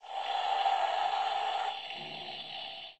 10 robot muriendo
robot, muerte, noise